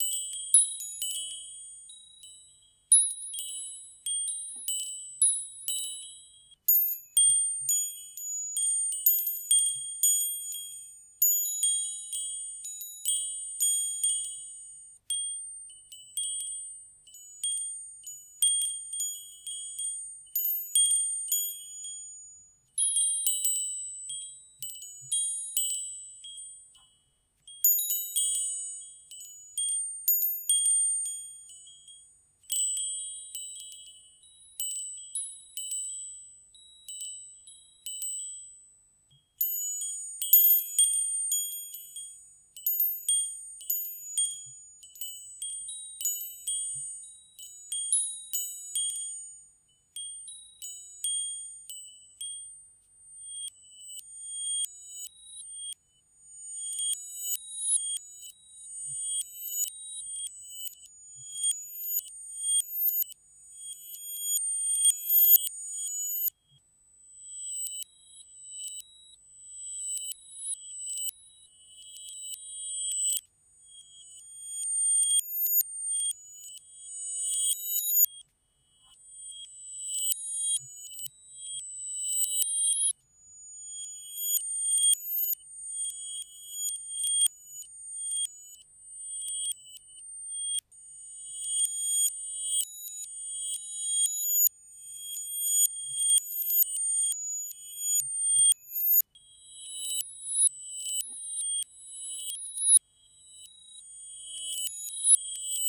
Metal Windchime (Plain) - A MakeNoise Morphagene Reel
A plain recording of a high-pitched, metal windchime. The reel is divided into two, long halves: one with the sound playing forwards and one with it playing in reverse. This means I can easily switch from forward to reverse without tuning issues, simply by applying CV to the Morphagene's 'Slide' control.
chime, spell, morphagene, wind-chime, mgreel, chiming, reel, windchime, sparkly, ring, jingle, ringing, sparkle, makenoise, tinkle, ting, bell, tinkling, clang, ping, metallic, chimes, ding